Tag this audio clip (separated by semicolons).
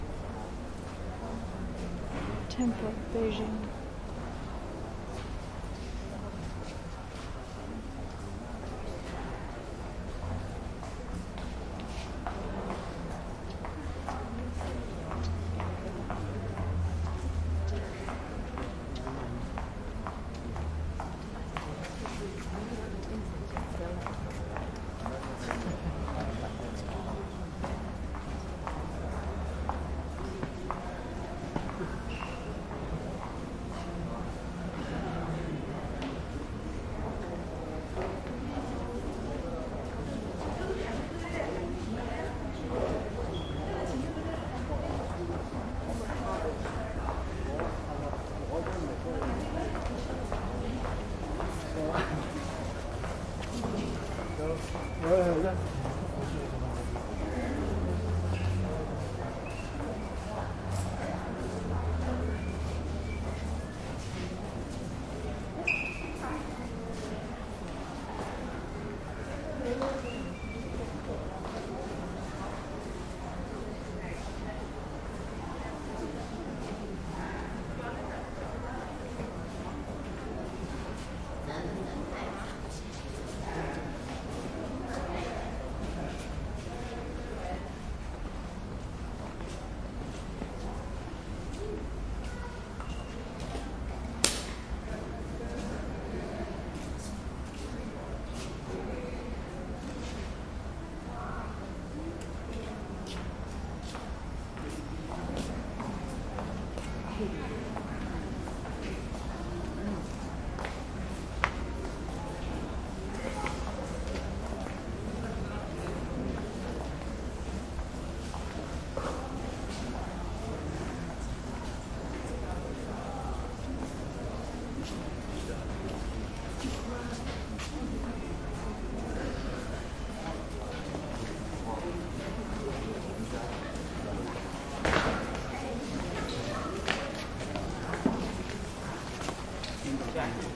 bank; China; crowd; light; museum; public; space; steps; voices; walla